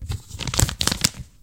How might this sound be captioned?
Unfold a map
Unfolding a map
crumple, flip, fold, folding, map, newspaper, page, paper, reading, turn